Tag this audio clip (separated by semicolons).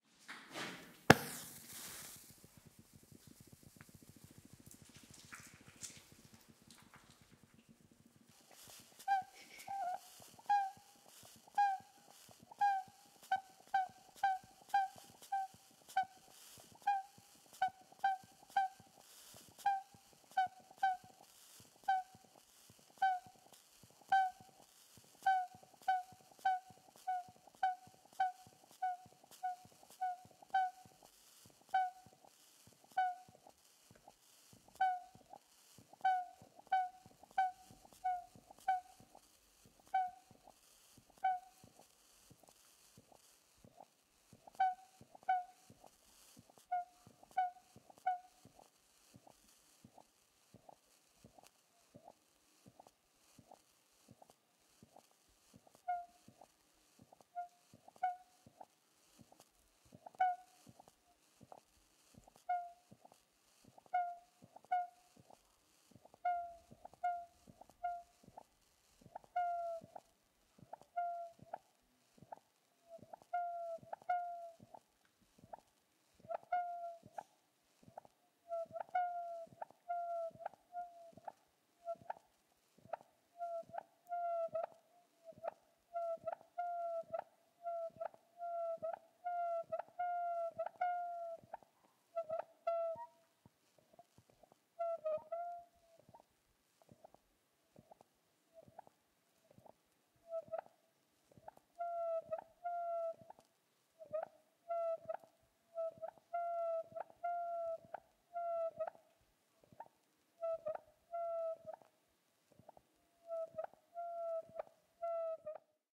acethylene; calcium; carbid; carbure; career; carriere; catacombs; lamp; paris